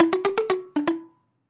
Pringle Lick
hitting a Pringles Can + FX